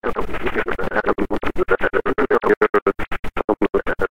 sound-design created from processing a vocal sample (the voice is germany's thomas brinkmann) from my syndicated net/radio show